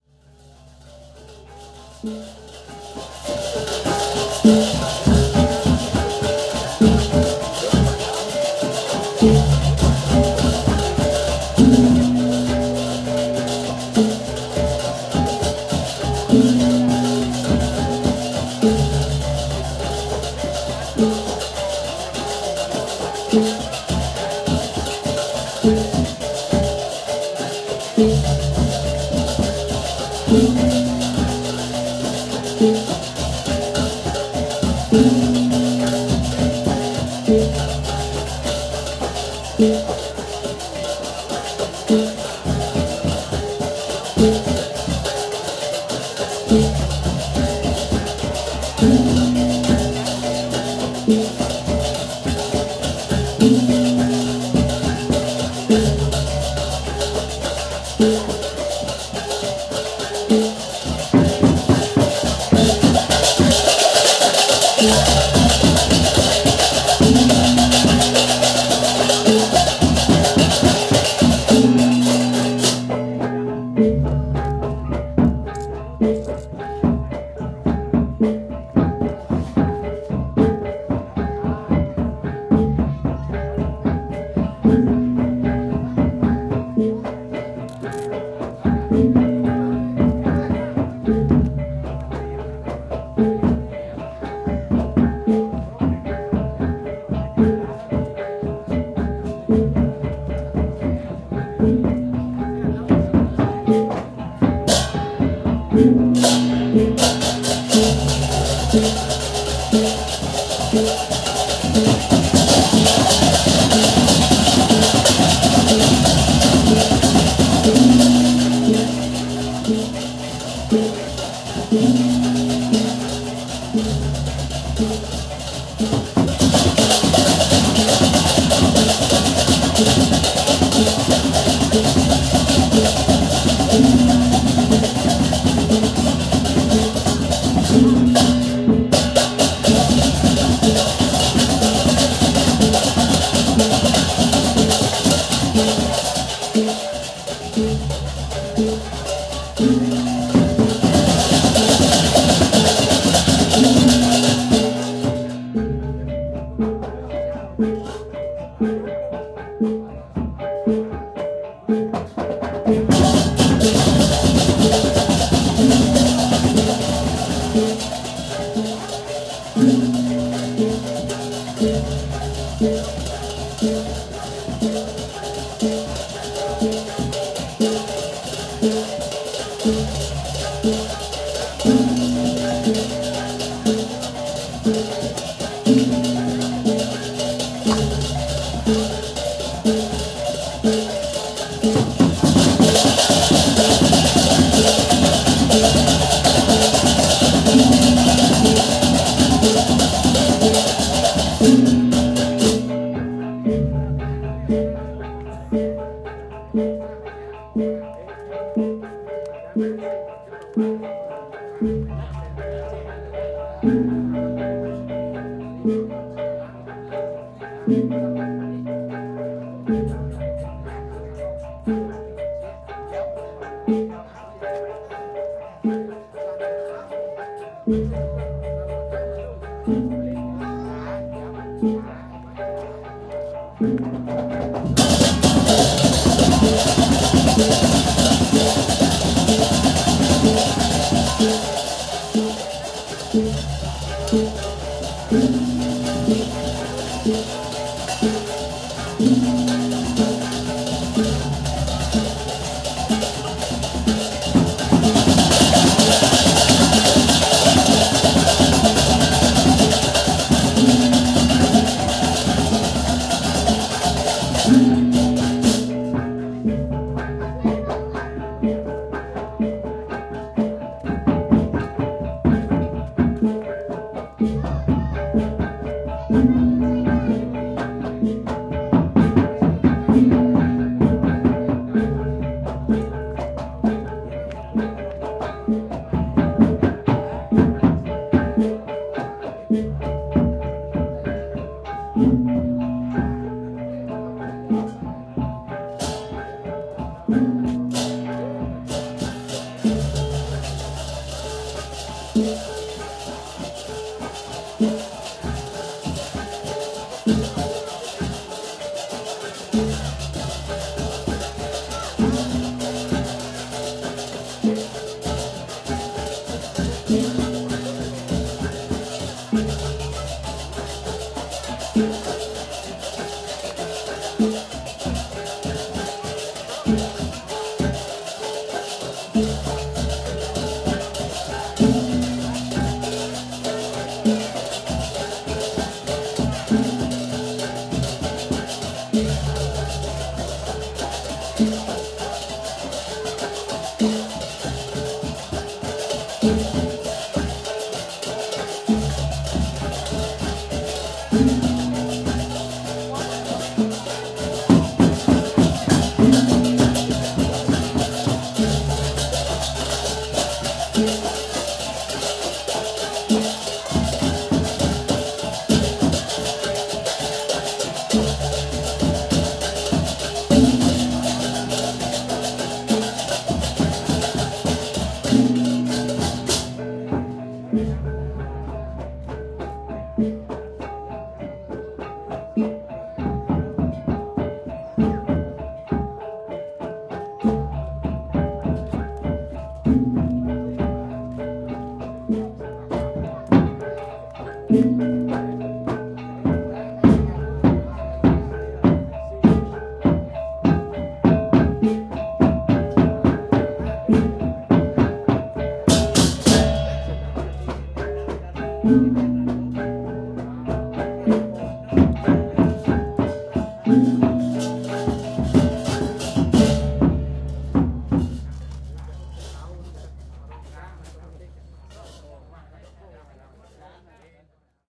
Bali Cremation Ceremony - Prelude
Recorded here is the prelude music for the cremation, or Ngaben ceremony taking place in Ubud Bali, Indonesia.
The gamelan musicians are heard playing at the temple as the elaborate coffin is prepared just prior to the procession to the cremation site where it will be burned.
Sony PCM-D50
Buddha, indonesia, ubud, gamelan, burn, pyre, field-recording